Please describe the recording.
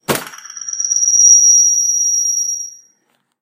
A Blue Yeti microphone fed back through a laptop speaker. Microphone held real close to invoke feedback. Sample 1 of 3, normal (high) pitch. Note that this sample has a thump at the beginning to make it sound like the microphone was dropped.